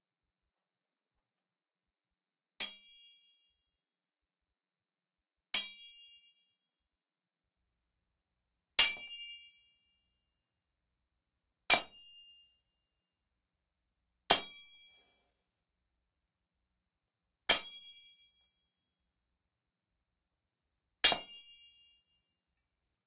machete fight hit sword metallic metal free